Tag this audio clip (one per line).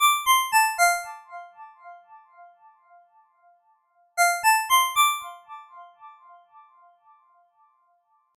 alarm
alert
alerts
cell
cell-phone
cellphone
mills
mojo
mojomills
phone
ring
ring-tone
ringtone